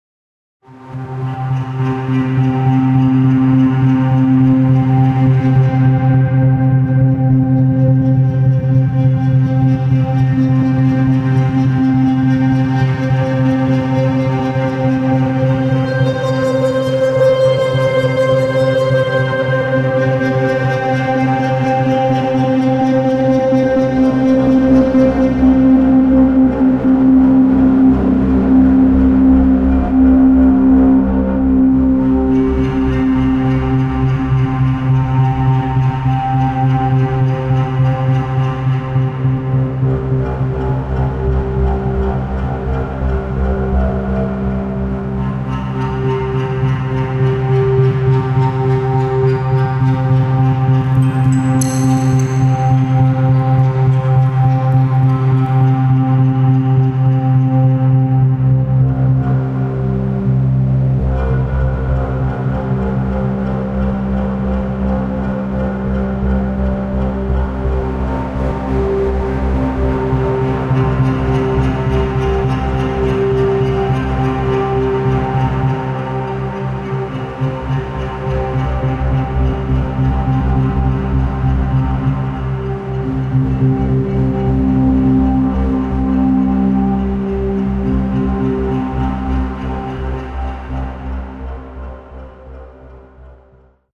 A strange synthscape.
field-recording, processing, synthesis, synthscape, synth